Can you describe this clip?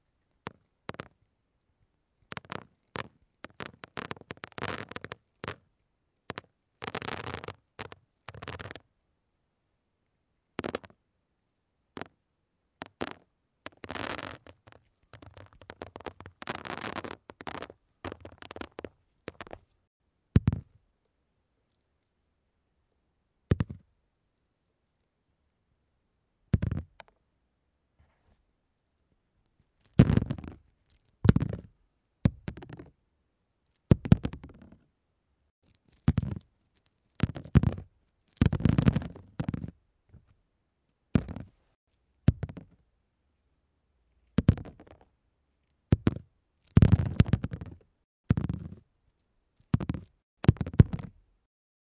piedrecitas cayendo 1
caida, ceramica, close-up, drop, little, lluvia, piedra, piedrecita, rain, stone